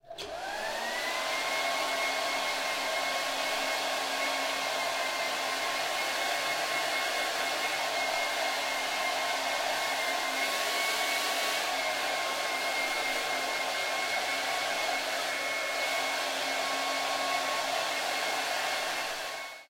Hair-drying
Drying hair in bahtroom with hairdryer.
Field-recording, Hair-Dryer, Dryer, Bathroom